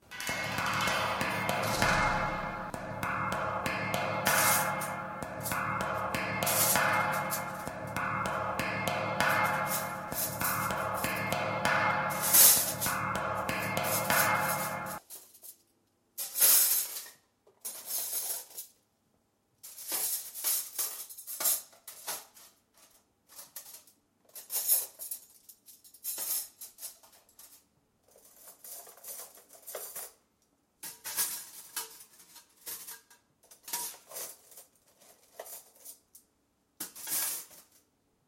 TCR Soundscape HCFR JulesLP-clement
France, Pac, Soundscapes